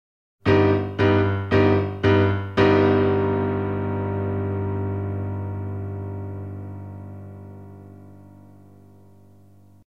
Piano Jazz Chords
music, jazz, piano